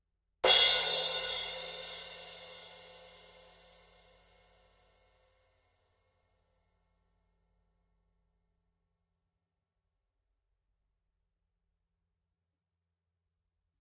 Paiste 2002 17" Crash Harder Hit - 2009 Year Cymbal
Paiste 2002 17" Crash Harder Hit